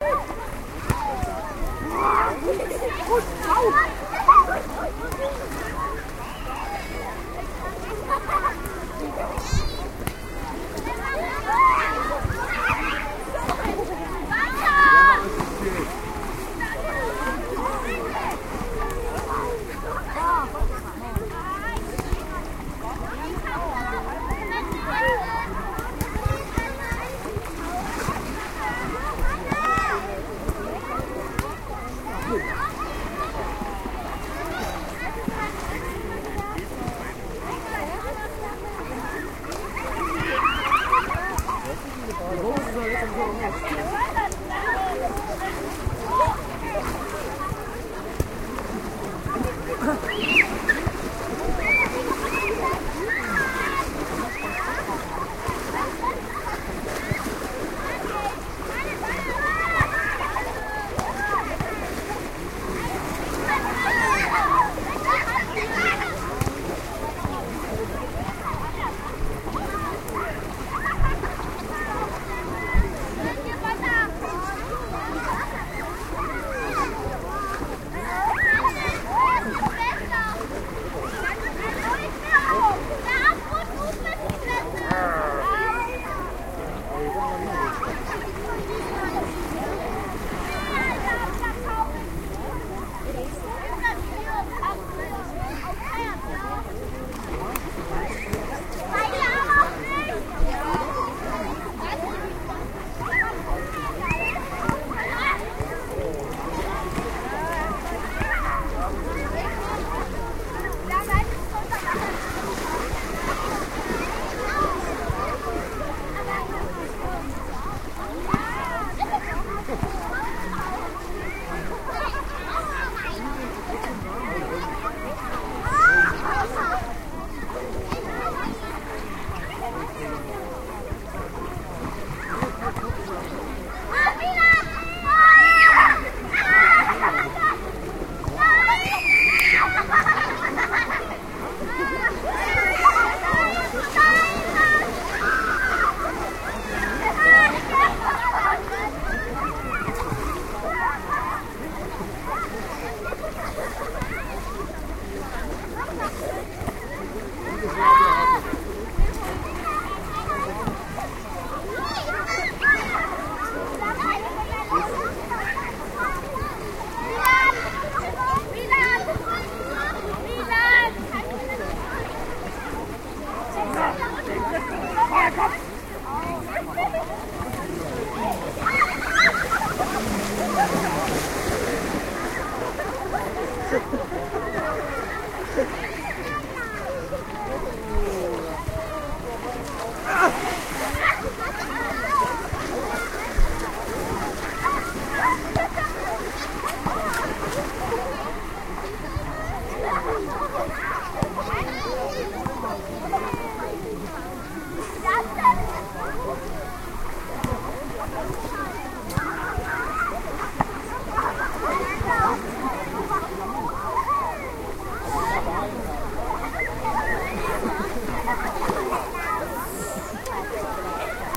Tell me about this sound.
Strandbad - im Wasser - Sommertag - Parsteiner See - 201107
Recorded summer 2011 in a lake called "Parsteiner See" - Germany, region Brandenburg, near the village Parstein.
atmosphere, summerday